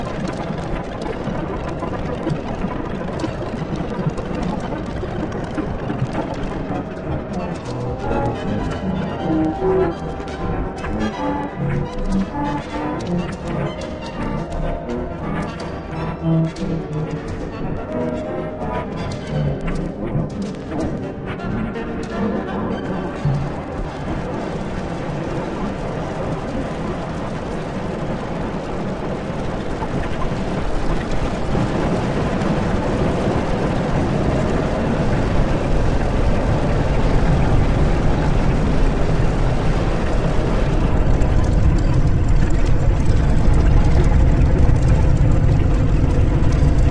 Alien Talk Granular Excerpt
Ambience Field Recordings, Used granular sythesis to get results
alien, all, crazy, excerpt, granular, neptune, noise, over, place, shit, synthesis, talk